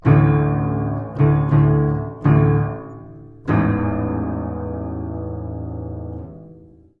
piano charge 3
Playing hard on the lower registers of an upright piano. Mics were about two feet away. Variations.
doom, low-register, piano, play-hard